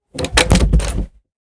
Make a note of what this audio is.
Violently closing wooden door
Sound of a door closing omg
Noticed that my door was quite loud - so I recorded some sounds of it with my phone close to the moving parts of the door.
close, closing, door, foley, fx, grab, help, idk, mechanical, open, opened, phone, recording, umm, wood